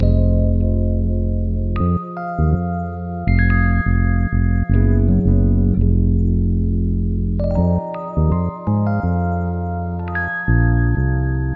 ambientloop83bpm
ambientloop, recorded with real rhodes!!
smooth, jazz, rhodes, fender, ambient